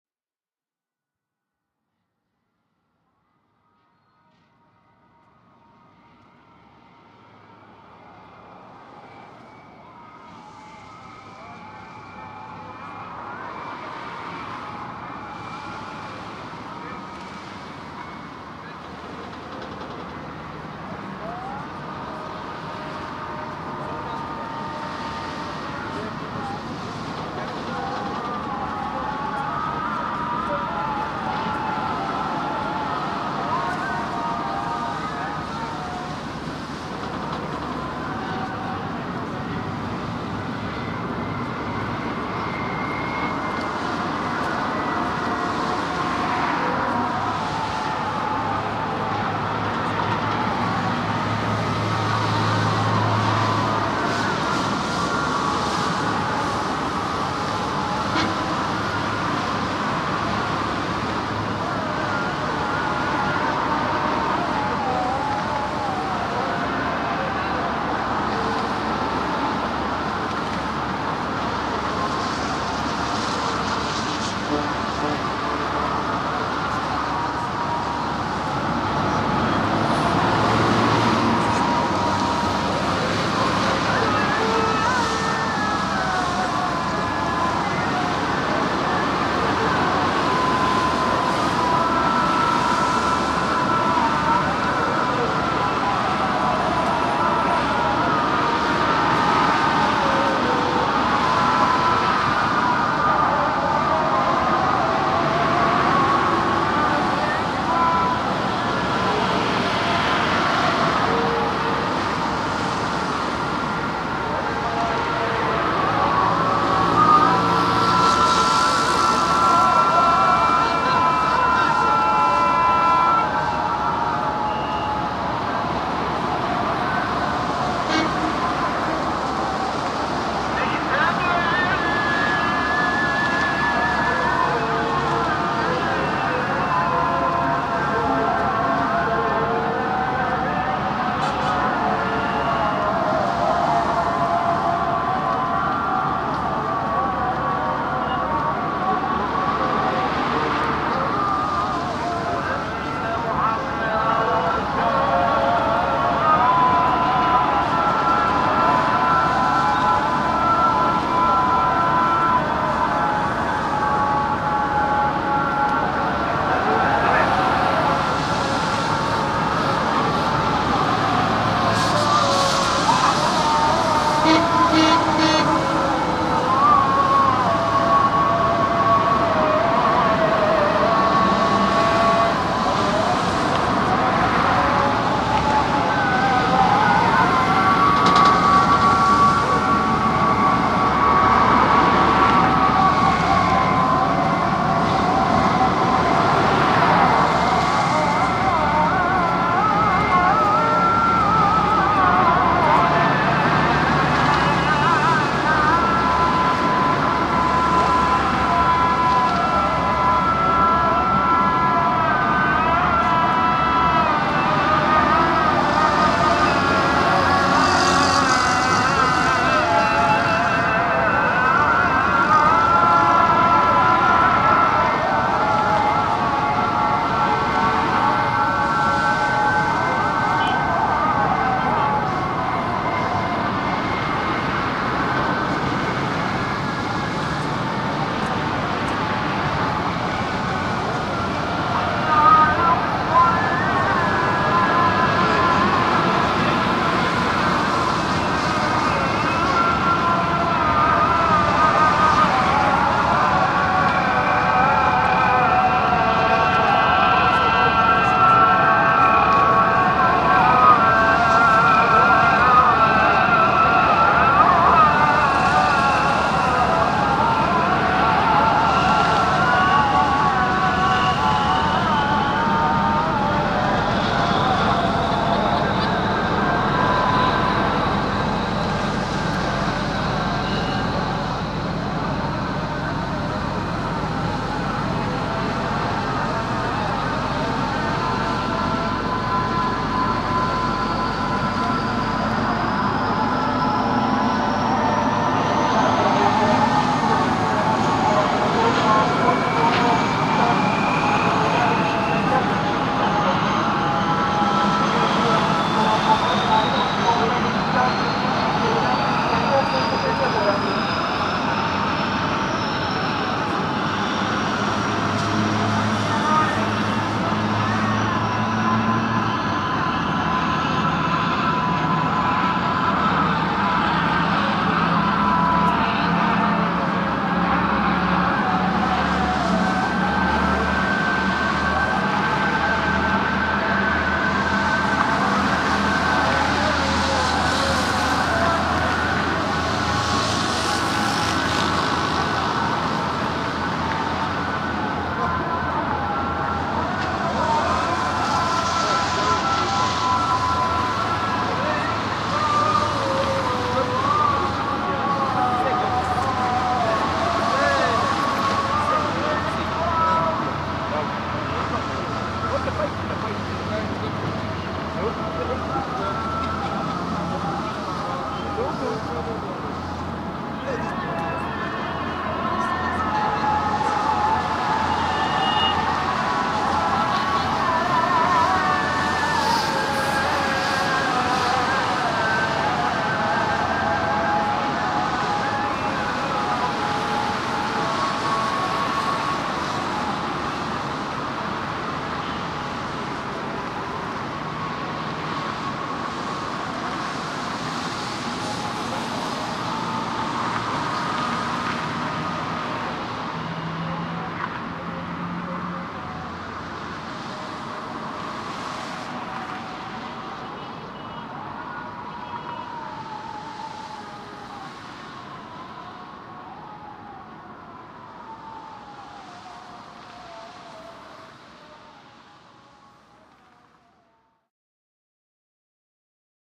atmos bridge
right in the middle of the bridge Istanbul became fascinated with the cacophony od the place. There are boats, cars, sounds of the actual bridge, prayers heard from both sides of land...and many more...Istanbul Turkey.
cacophony, atmos, bridge, city